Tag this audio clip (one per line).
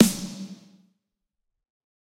drum
drumset
kit
pack
realistic
set
snare